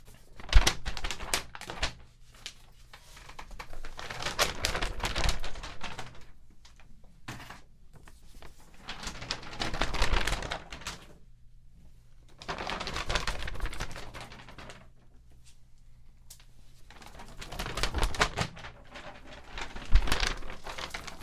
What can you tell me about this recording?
The sound of some paper being moved
fly, foley, paper, wings